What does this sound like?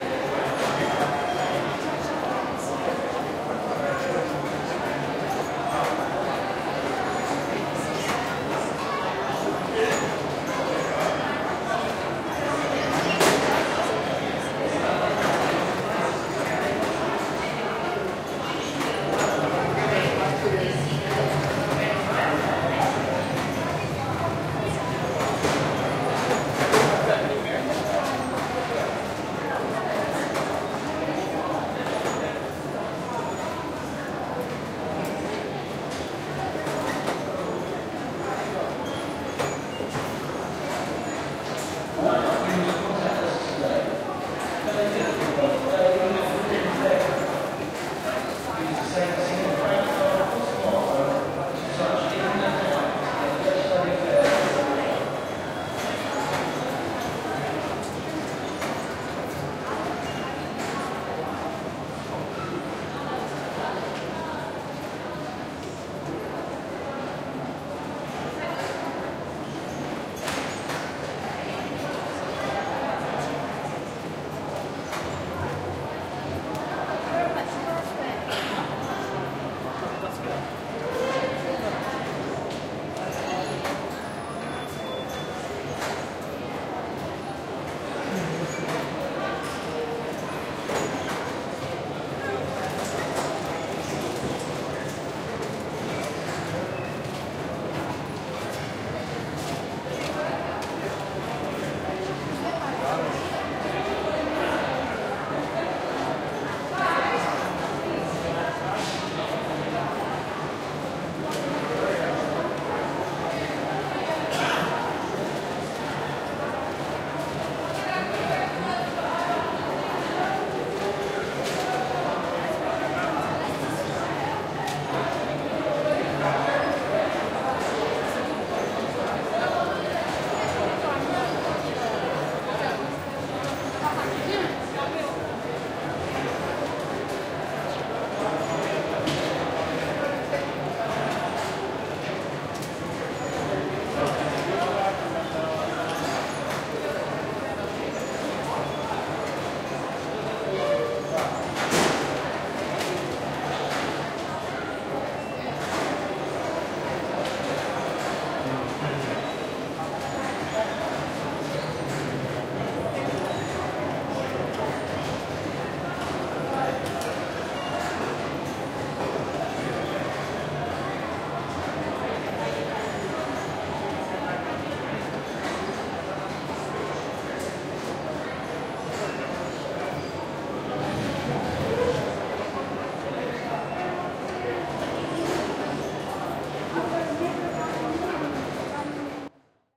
Piccadilly Circus Undergound Station Foyer
Standing in the foyer at Piccadilly Circus Underground station.
If you would like to support me please click below.
Buy Me A Coffee
UK, Transport, Lobby, Tube, Atmosphere, Ambience, Metro, London-Underground, Trains, Busy, TFL, Public-Transport, Atmos, Foyer, City, Subway, Commute, England, Public, Underground, United-Kingdom